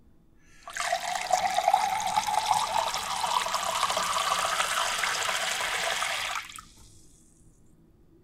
faucet glass tall
filling a glass of water from the faucet
liquid, fill